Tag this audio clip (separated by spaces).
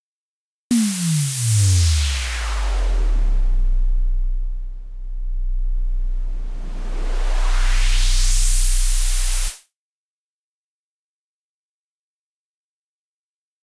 soundeffect dub-step stereo intro trailer effect dj sfx riser instrumental chord fx drop send podcast instrument loop mix interlude music radioplay electronic imaging fall broadcast noise deejay slam jingle radio